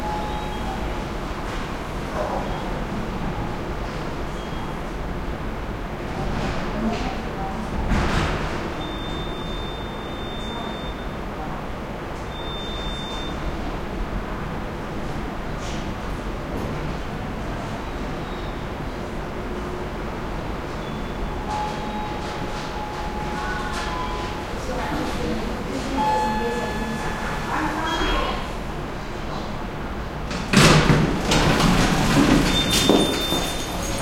hospital hall3 heavy ventilation, distant sounds, elevator open and cart comes out Montreal, Canada
heavy, hall, Montreal, Canada, hospital, ventilation